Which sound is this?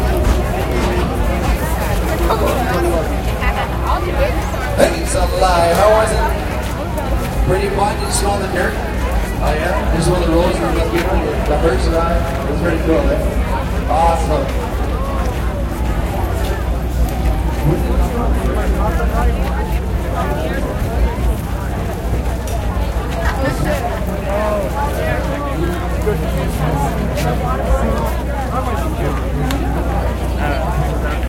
Toronto Ribfest 1Jul2011
Crowd ambience at the Canada Day ribfest in Centennial Park, Toronto, 1 July 2011. Roland R05 with Roland condenser stereo microphone.